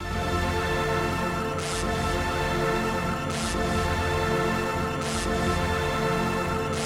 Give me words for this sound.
140 sound fx 7
140 bpm dubstep sound fx
140-bpm, sound-fx